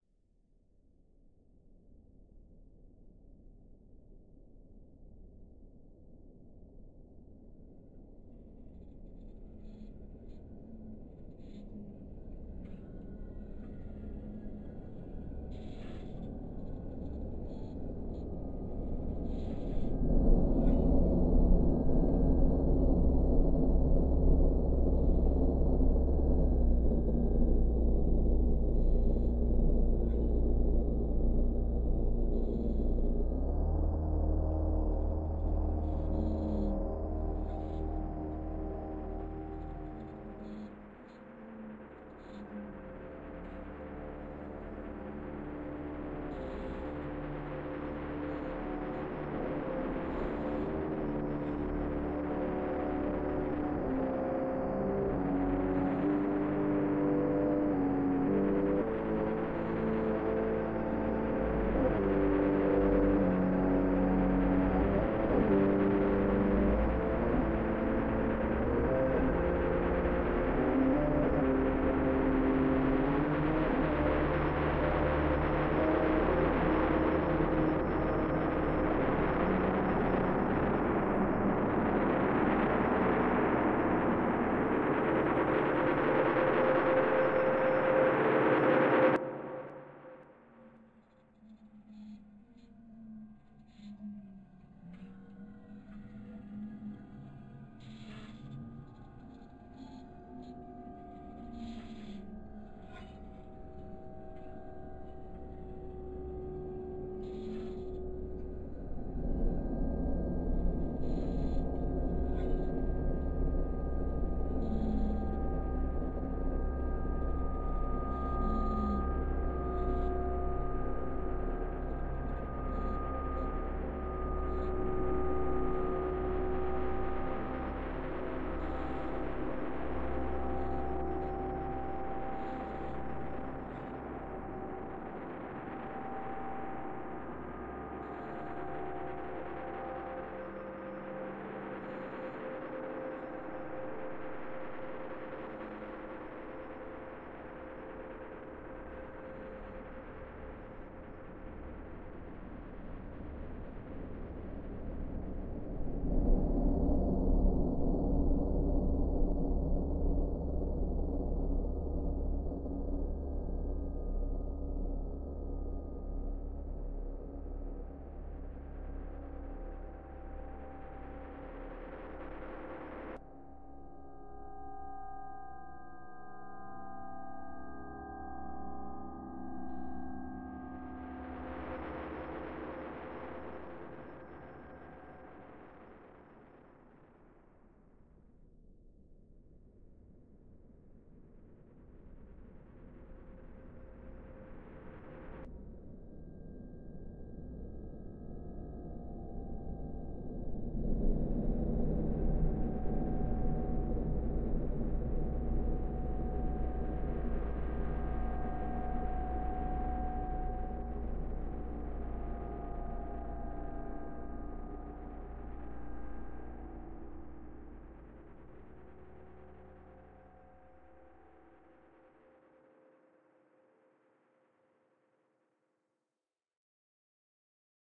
Ambient level / location sound 4
Level sound, ambient.
ambiance, ambient, anxious, background, background-sound, creepy, disgust, disgusting, drone, dying, evolving, experimental, fear, Gothic, haunted, horror, level, light, loading, location, nature, pad, scary, sinister, soundscape, stalker